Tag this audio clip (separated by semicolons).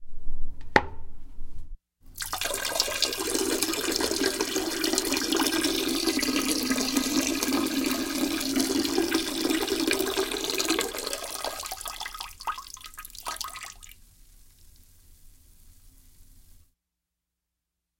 toilet; peeing; pee; stream; urinating